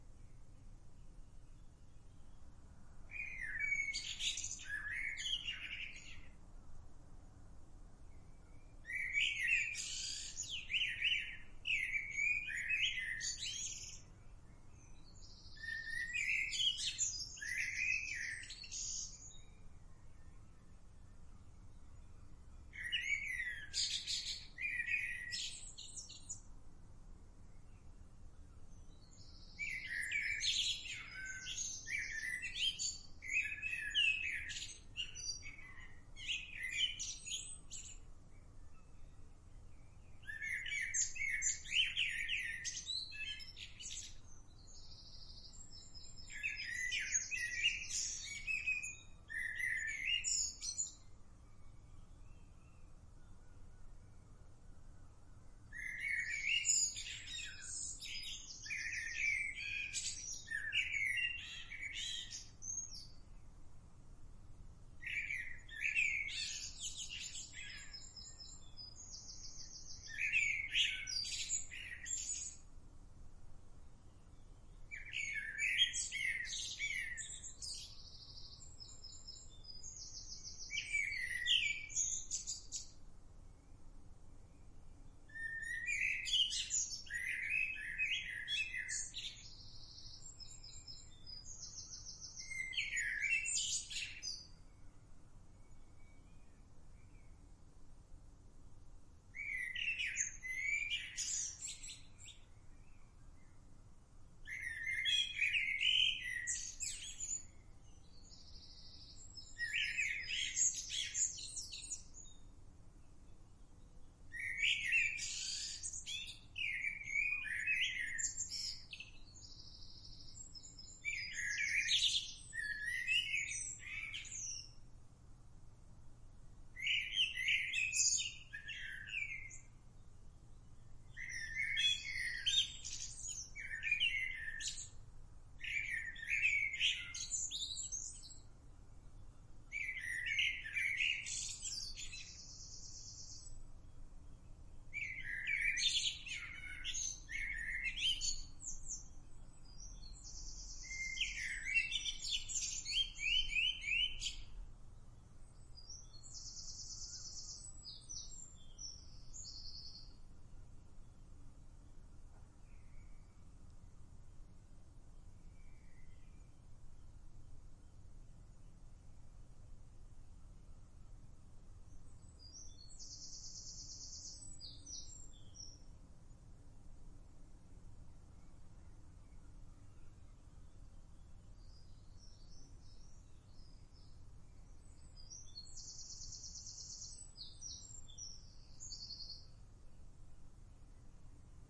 Blackbird in summer
A blackbird doing its thing in my garden this morning.
Sound Devices MixPre3 with FEL Pluggy XLR mics.
bird, birdsong, blackbird, england, english, field-recording, garden, loop, loopable, nature, uk